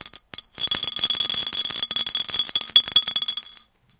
Object in glass jar
scrapes, objects, thumps, variable, hits, taps, brush, random